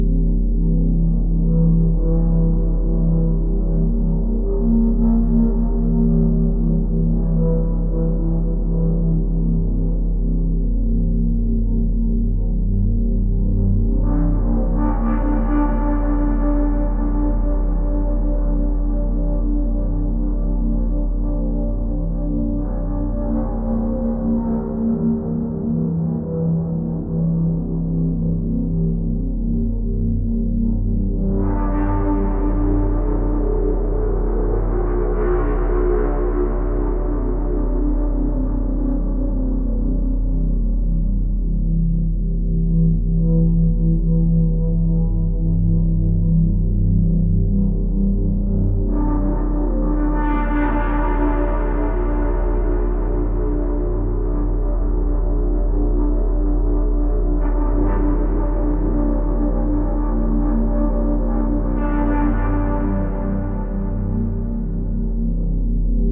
Ominous and Deep Ambience
I was just going through my sounds, when I decided "Hey... I'm gonna release this." So here you all go! I'm really proud of how this turned out. This, as you can tell, is a deep eerie ambience loop. It can be used for anything you want. I like to leave things up to the imagination of the audience.
HOW IT WAS MADE:
Software used:
-FL Studio 20
-Harmor
-Harmless
-Fruity Parametric EQ 2
-ValhallaRoom
So basically what I did was get a low pitch sawtooth waveform, put it through some effects like harmonization, a low pass filter with high resonance, a phaser, and a load of distortion. The main synth is made with Harmor. (Yes, this is all one synth. It just has a lot of dynamics.) I then processed it with some EQing. What made this sound what it is would be the reverb. I put 2 layers of ValhallaRoom on the synth. One has a very short decay time, and gives it texture. The other gives it the atmosphere. To add some more depth, for the final touch, I layered a sub-bass underneath it.